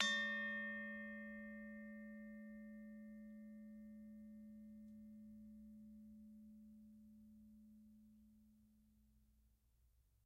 Bwana Kumala Ugal 05
University of North Texas Gamelan Bwana Kumala Ugal recording 5. Recorded in 2006.
percussion, bali, gamelan